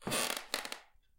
Wood Creak Single V3
A single creaking wooden floor step. This is one of 7 similar sounds and one longer recording with 4 creaks in the same sound pack.
creepy, dark, sound